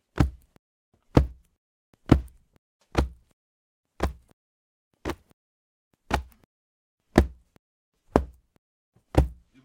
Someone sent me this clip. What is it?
Falling on ground 2
By smashing and slamming a pillow on the couch, we created a foley sound that sounds like someone falling on the floor/ground or something heavy being dropped on the floor/ground.